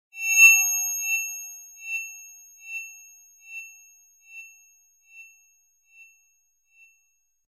A fairly simple faded note.